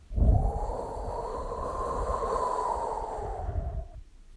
wind breeze swoosh air gust